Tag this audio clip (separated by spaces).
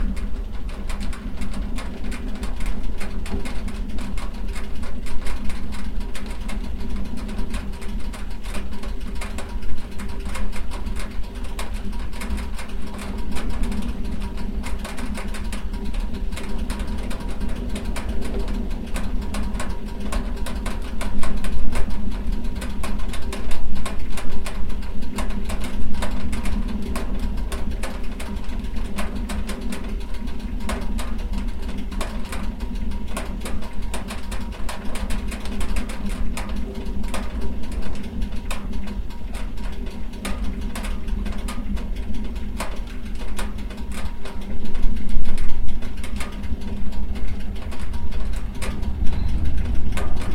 burn burner burning combustion crackle crackling fire fireplace flame flames gas heat hot stove ticking